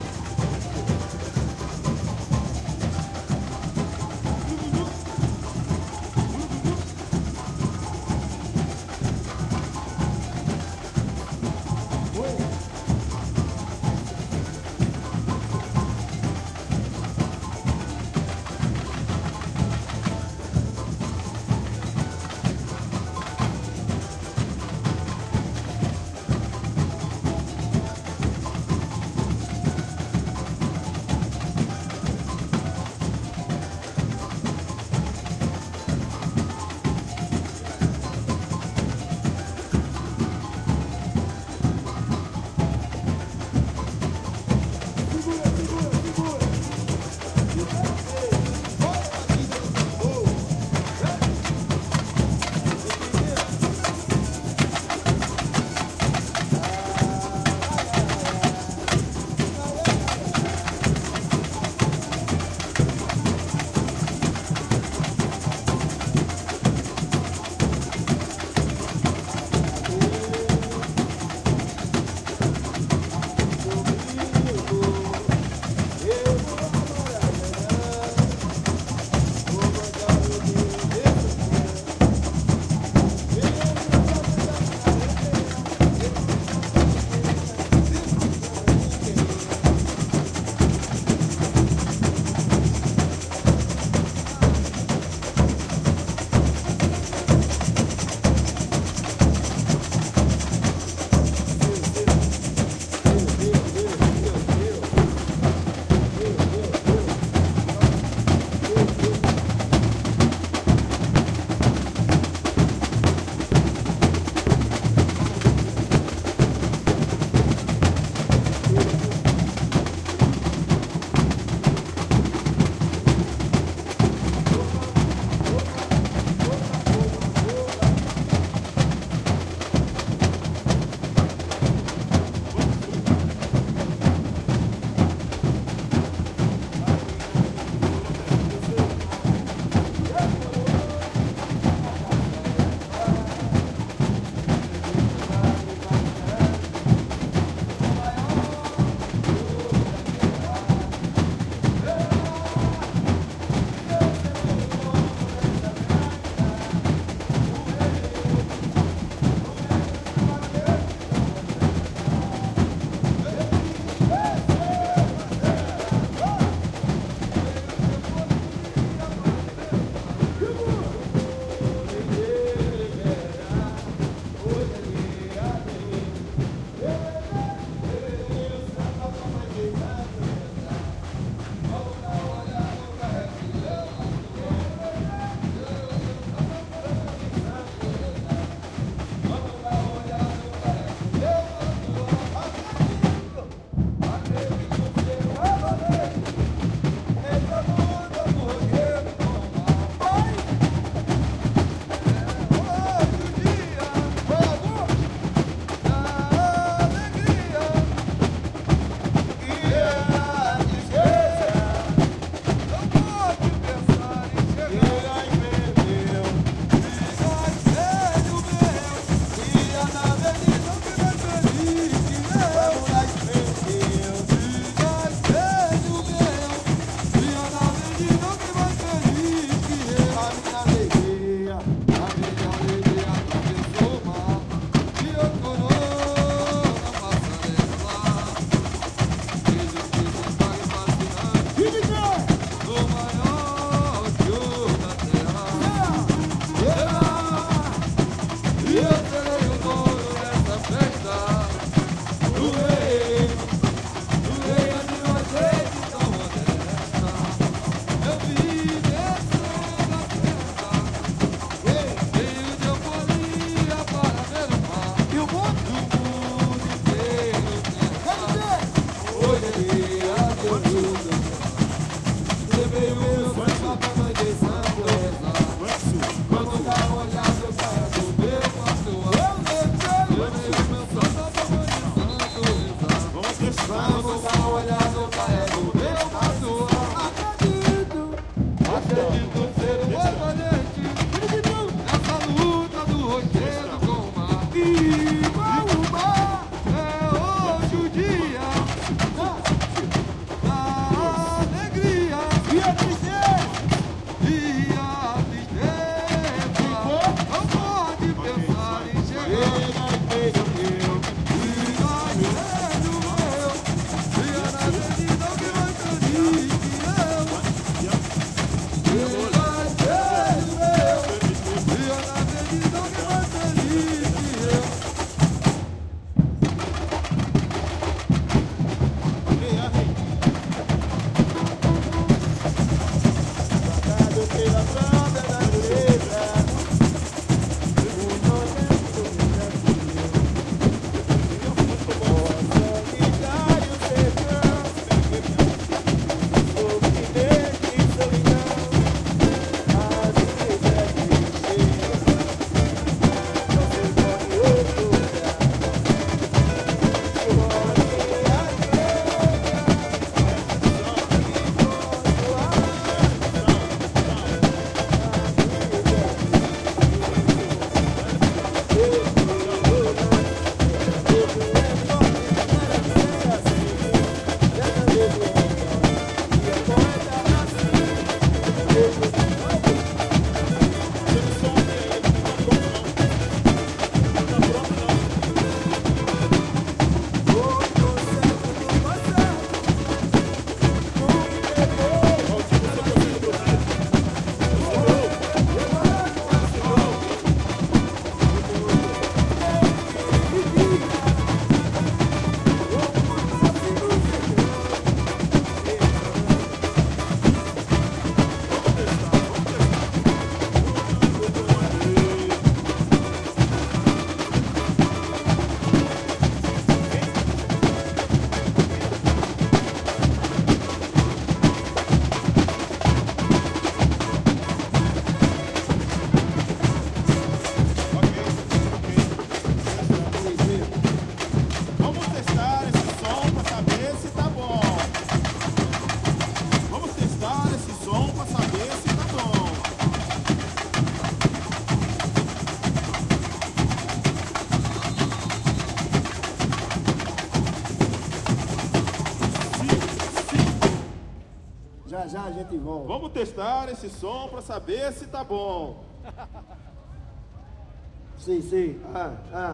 samba rehearsal
Samba batucada rehearsal before starting the parade at the Berlin Carnival of Cultures May 2010(Karneval der Kulturen). Zoom H2
bateria, batucada, bloco, carnaval, carnival, escola, escola-de-samba, karneval, percussion, rio, rio-de-janeiro, samba, samba-groove